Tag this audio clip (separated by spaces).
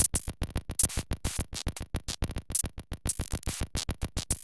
electronic noise